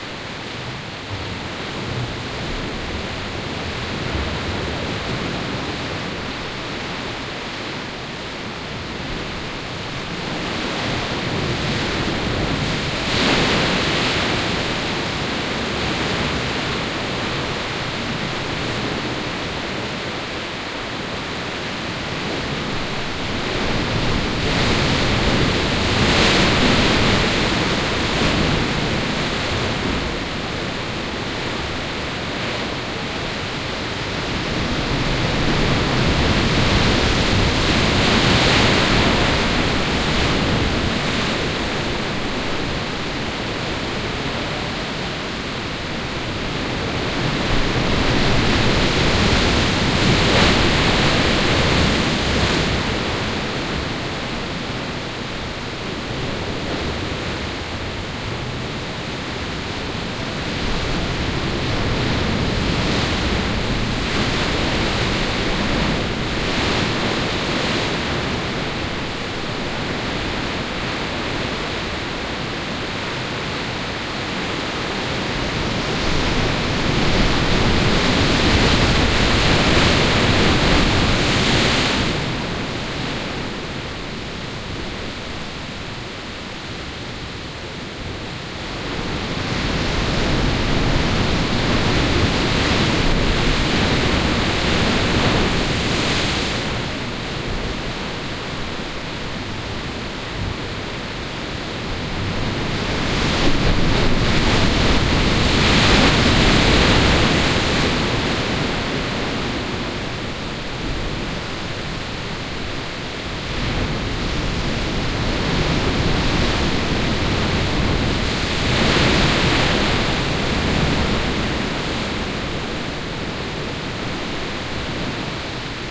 "Cape Spartel" is the place where the Mediterranean sea meets the Atlantic ocean. The water dug caves into the rock of the coast : where this recording took place.
Zoom H2 + Soundman OKM II
Cape Spartel, Morocco - january 2011